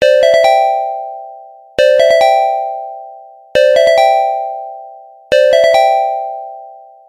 Simple ringtone I made in a few seconds. You can loop it infinitely.
I'd appreciate very much if you could tell me where you're using the sound (videogames, videos etc.). I'd like to see them.
cellphone, ring, ringtone, alerts, simple, ring-tone, phone, cell, cell-phone